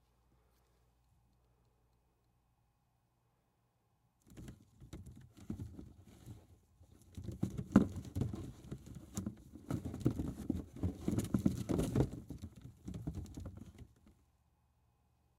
Stirring Baseballs FF106
Stirring Baseballs Thump bump movement
Thump, bump, Baseballs, movement, Stirring